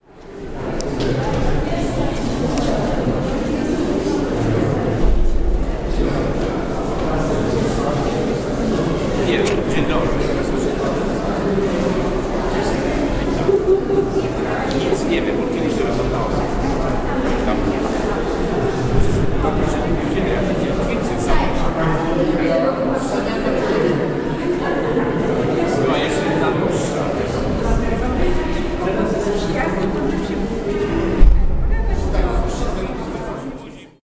before promotion091210
09.12.2010: about 12.00. hall in the main Adam Mickiewicz University in Poznan (Wienawskiego street). people are waiting for the graduation ceremony for the doctoral degree.
steps, field-recording, celebration, university, hall, people, voices, hubbub